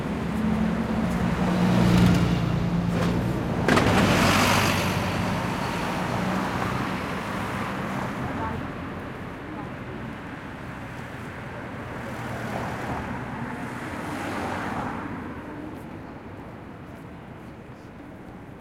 130216 - AMB EXT - Tower Bridge
Recording made on 16th feb 2013, with Zoom H4n X/y 120º integrated mics.
Hi-pass filtered @ 80Hz. No more processing
Ambience from tower bridge traffic. take on the middle of the road
doppler, tower, london, traffic, bridge